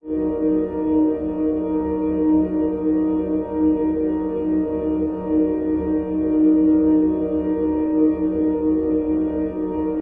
hg pad 168864
drone, pad, smooth, tense, tension